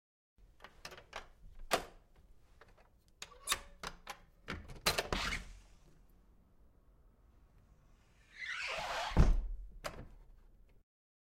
unlock and open door